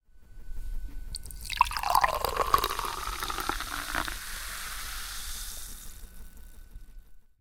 Champagne poured into a glass - recorded with Zoom
beverage, Champagne, fizzy, liquid, pouring